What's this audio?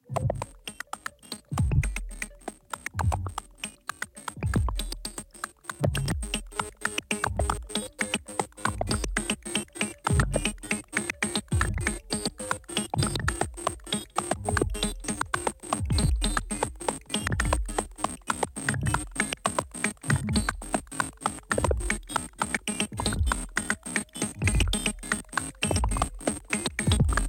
synthesizer processed samples